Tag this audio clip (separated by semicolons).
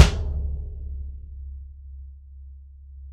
1-shot; drum; velocity